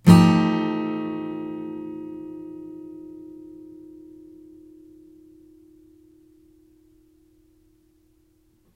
Yamaha acoustic through USB microphone to laptop. Chords strummed with a metal pick. File name indicates chord.
acoustic, chord, strummed